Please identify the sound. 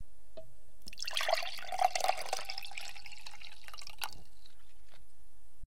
The usual sound of water pouring into the glass